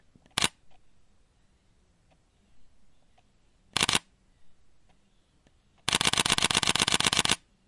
Sony a6400 shutter. Sound taken using Zoom H2.
dslr, shutter